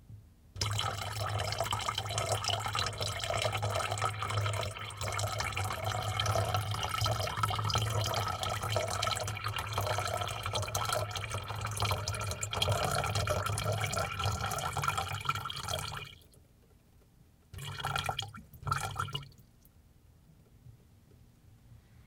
By request.
Water poured into a plastic half-gallon (about 2L) container.
AKG condenser microphone M-Audio Delta AP